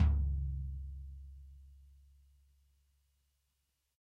Dirty Tony's Tom 16'' 041
This is the Dirty Tony's Tom 16''. He recorded it at Johnny's studio, the only studio with a hole in the wall! It has been recorded with four mics, and this is the mix of all!
16, dirty, drum, drumset, kit, pack, punk, raw, real, realistic, set, tom, tonys